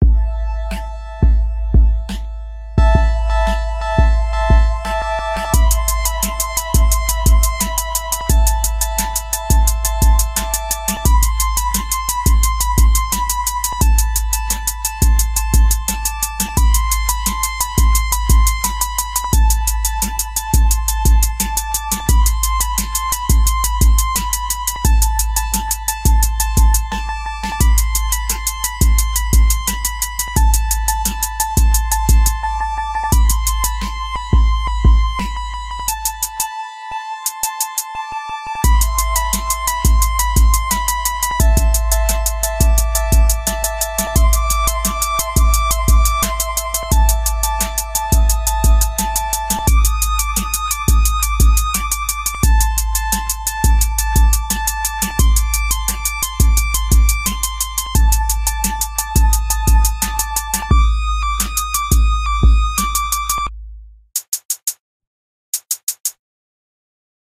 Rap beat loop in which I used some piano samples. Created in LMMS.